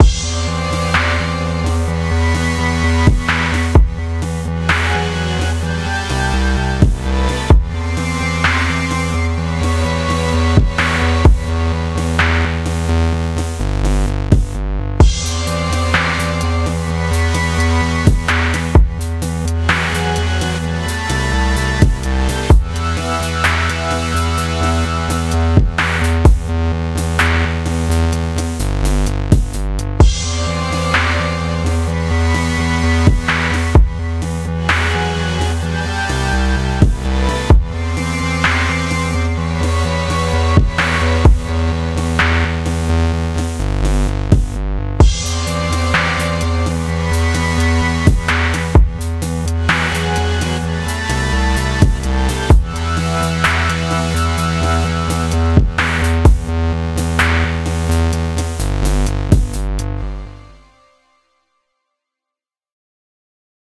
Debe Givu
64bpm, E, loop, minor, music